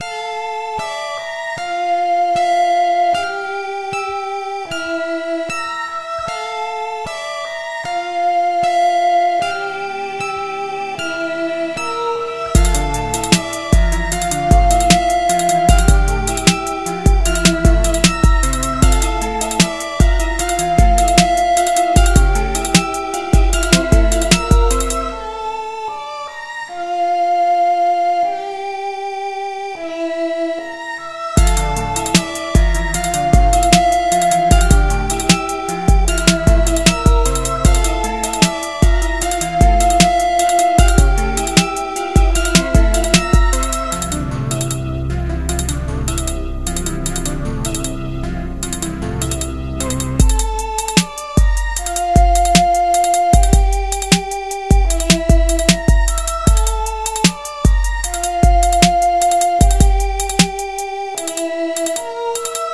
Sad Hard Beat.
Hip Hop Type Sad Beat 153bpm. I Made it on abelton live lite.
hard, beat, drum, bass, sad, loop